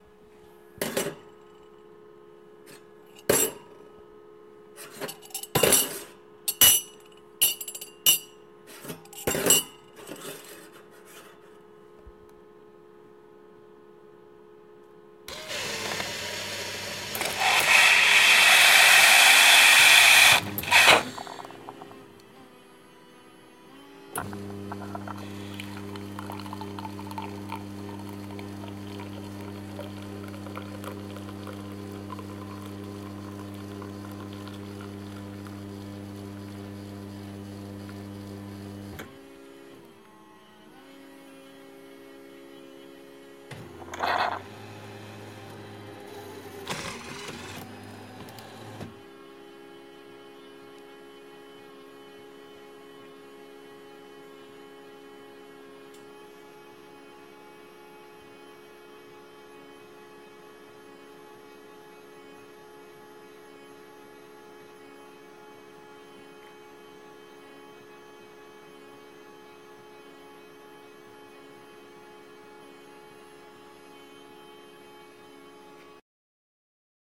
COFFEE MACHINE AUTO
Coffe-Machine cup auto fill
auto, Coffe-Machine, cup, electronic, fill